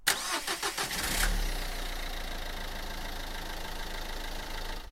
Car Ignition, Exterior, A
Raw audio of the ignition to a Renault Grand Scenic from the exterior.
An example of how you might credit is by putting this in the description/credits:
The sound was recorded using a "H1 Zoom recorder" on 5th March 2016.